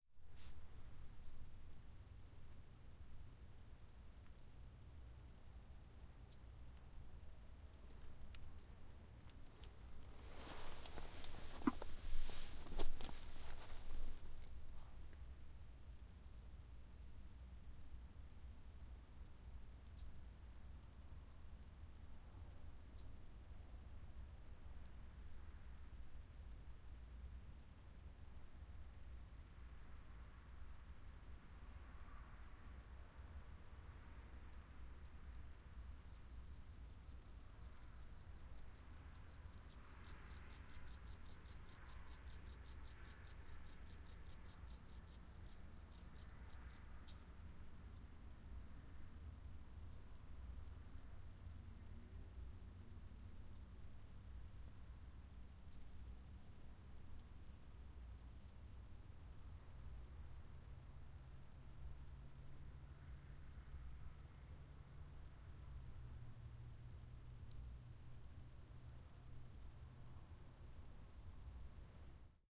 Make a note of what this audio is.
dramalj croatia on the hill above the village
Recorded in the forest on the hills above Dramalj, a small village next to Crikvenica, Croatia on 2007-06-24. You can hear cicadaes, crickets, and some cars passing the nearby priority road.
Location:
Recorded using Rode NT4 -> custom-built Green preamp -> M-Audio MicroTrack. Unprocessed.